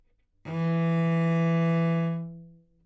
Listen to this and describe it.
Part of the Good-sounds dataset of monophonic instrumental sounds.
instrument::cello
note::E
octave::3
midi note::40
good-sounds-id::4281